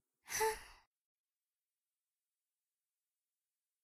girl sad sighing for video games clear and HD.
gamevoice, sad